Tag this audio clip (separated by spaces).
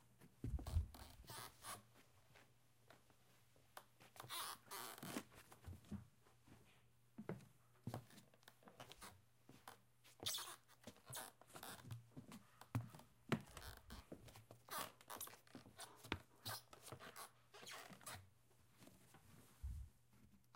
floor floorboards squeaky wood